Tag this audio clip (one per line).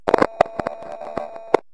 glitch
sound-design